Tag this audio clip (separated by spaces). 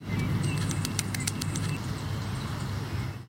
bird flag wings